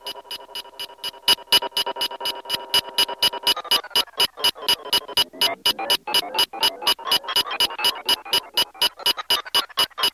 Freya a speak and math. Some hardware processing.
circuit-bent, glitch, speak-and-math